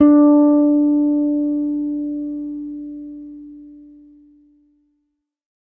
Third octave note.
bass, guitar, electric, multisample